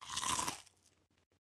That delicious crunchy sound!
bite, biting, chew, chewing, chip, chips, crisp, Crisps, Crispy, crunch, crunching, crunchy, eat, eating, food, fried, human, male, man, mouth, mush, potatoes, voice